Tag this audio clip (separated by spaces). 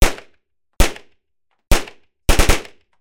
UZI weapon